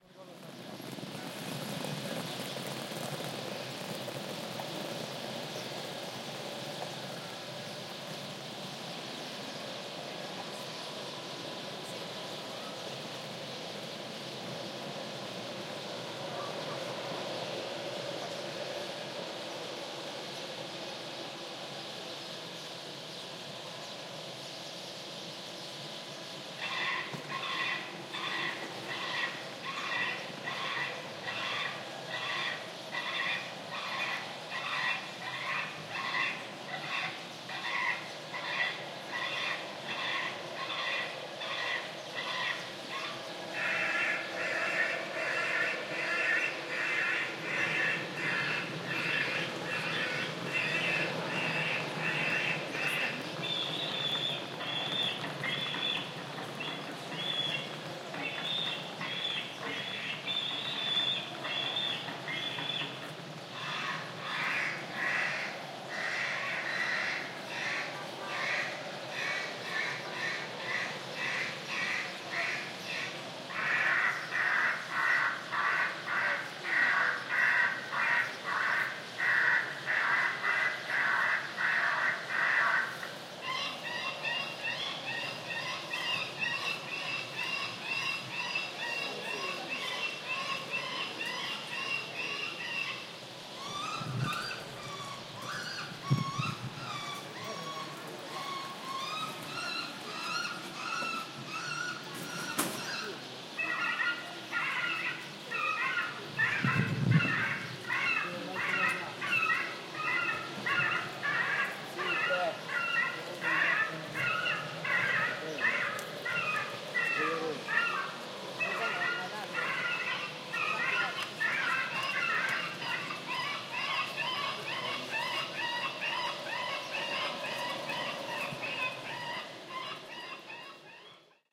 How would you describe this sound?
Starlings loudspeaker - Ciampino
Ciampino Airport: a moment of relative quiet, the noise of people walking and trolley on the asphalt. Suddenly attacks a loudspeaker with an hilarious series of verses of various birds at high volume. Recorded with a Zoom H4n.
Aeroporto di Ciampino: un momento di relativa quiete, persone che camminano e rumore dei trolley sull'asfalto. Improvvisamente attacca un altoparlante con una serie esilarante di versi di vari uccelli ad alto volume. Registrato con uno Zoom H4n.
public-address, field-recording